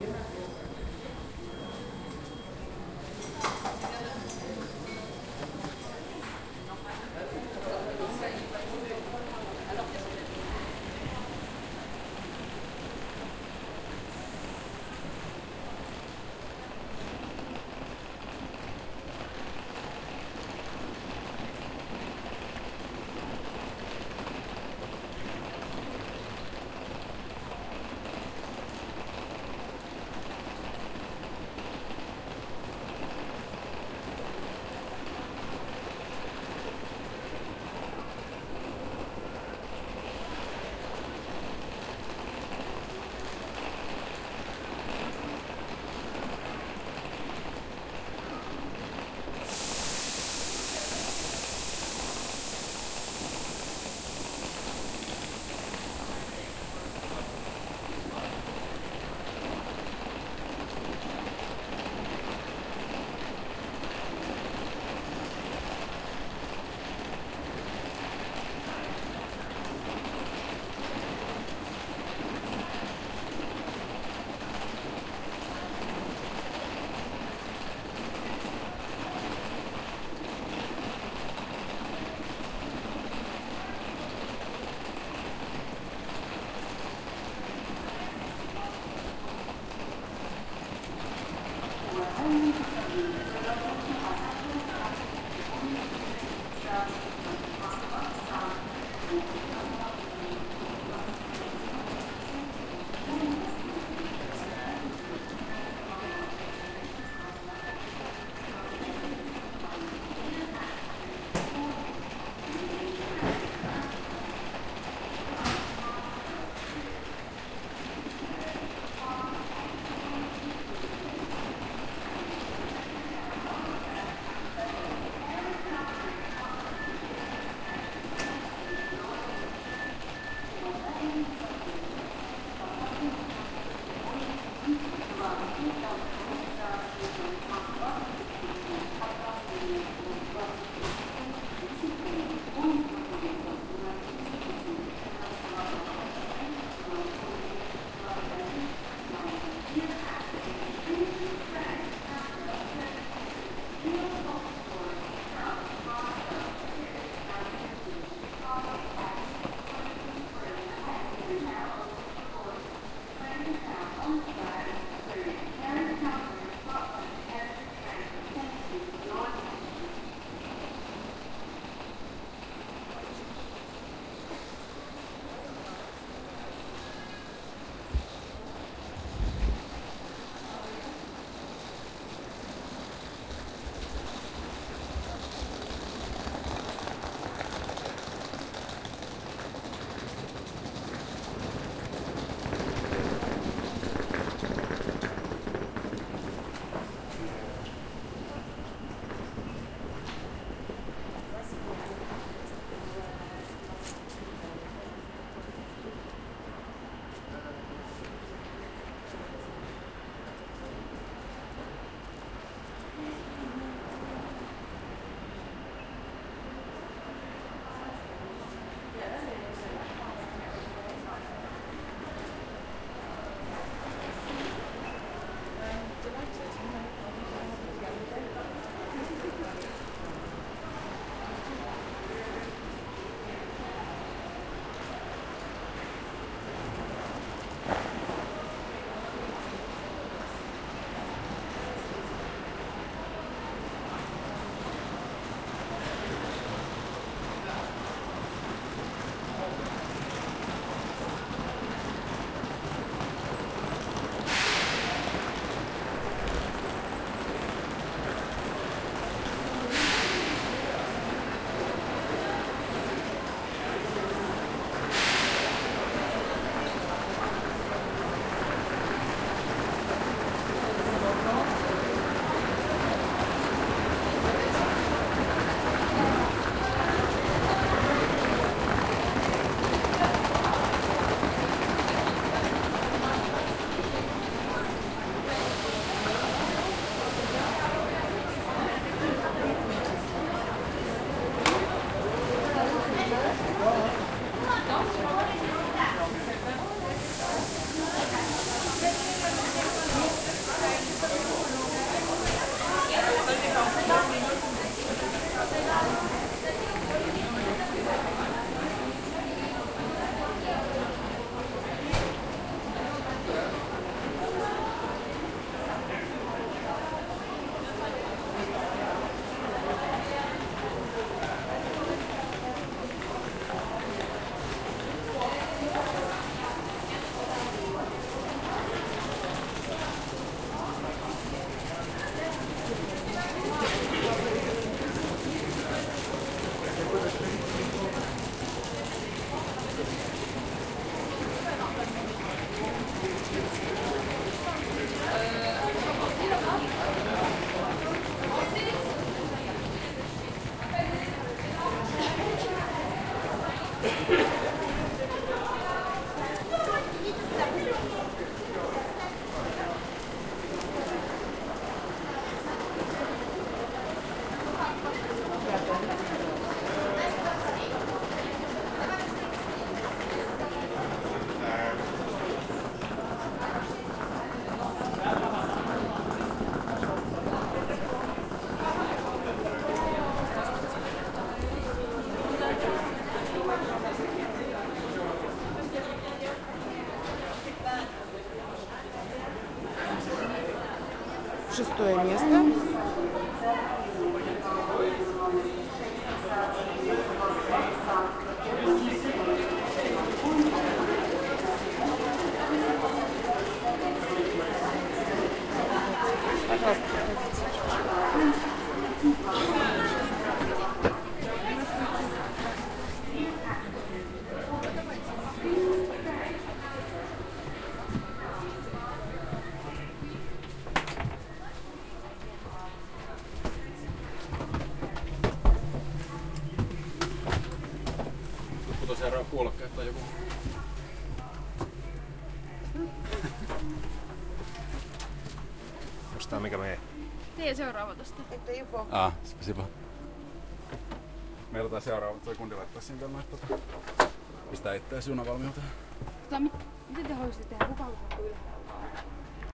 Struggling through Leningradsky railway station. Moscow
Station noises, announcements and chatter. Entering the train. Recorded with Tascam DR-40.
departure, field-recording, leningradsky, moscow, railway-station, train